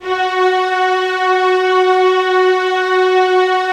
09-synSTRINGS90s-¬SW
synth string ensemble multisample in 4ths made on reason (2.5)
2, f, multisample, synth, vstrings